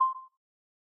Beep 03 Single
a user interface sound for a game